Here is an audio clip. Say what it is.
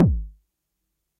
Arturia Drumbrute Analogue Drums sampled and compressed through Joe Meek C2 Optical Compressor